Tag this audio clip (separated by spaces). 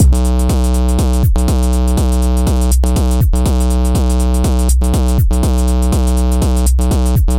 groovy drum-loop percussion-loop drum rhythm po32 beat fat